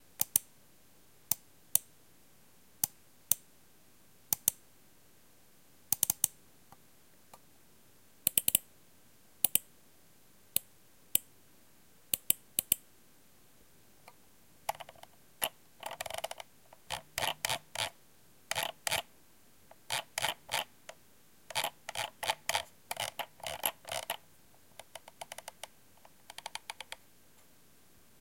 A couple of different mouse clicks and mouse wheel sounds.
Details: CSL TM137U mouse. Recorded with Zoom H4N.
Enjoy!